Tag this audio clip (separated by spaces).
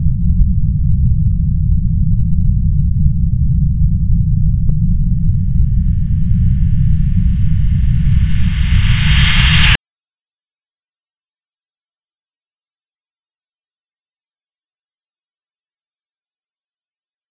movie film horror tension